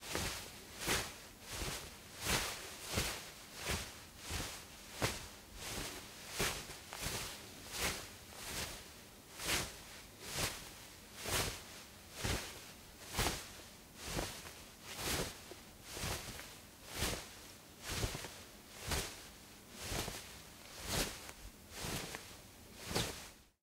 Cloth Rustle 6
Cloth, Movement, Foley, Rustle